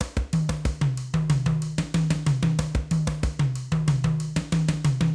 ethnic beat7
congas, ethnic drums, grooves